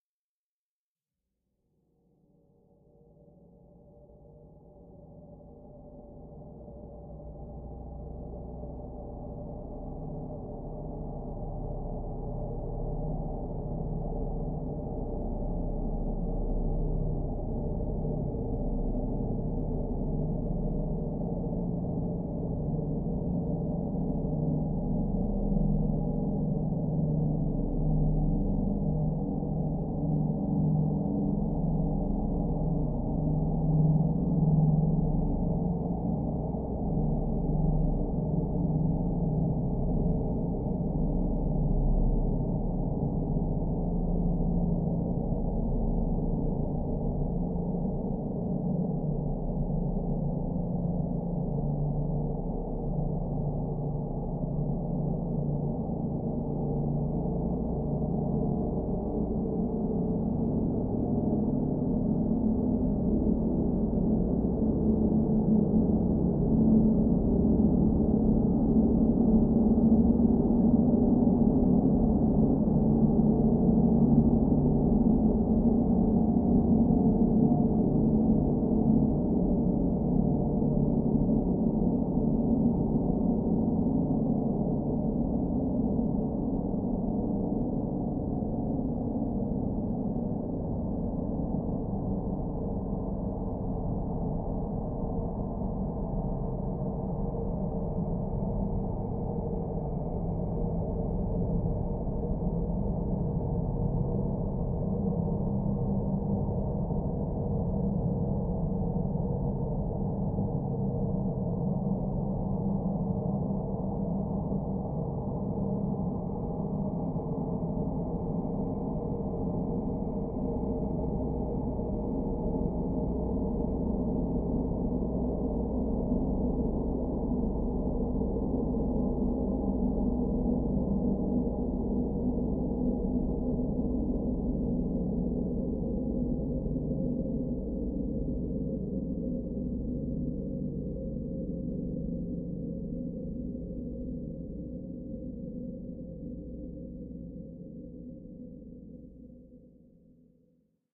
LAYERS 008 - MegaDrone PadScape - C0
LAYERS 008 - MegaDrone PadScape is an extensive multisample package containing 97 samples covering C0 till C8. The key name is included in the sample name. The sound of MegaDrone PadScape is already in the name: a long (over 2 minutes!) slowly evolving ambient drone pad that can be played as a PAD sound in your favourite sampler. It was created using NI Kontakt 3 within Cubase and a lot of convolution (Voxengo's Pristine Space is my favourite) as well as some reverb from u-he: Uhbik-A.
DEDICATED to XAVIER SERRA! HAPPY BIRTHDAY!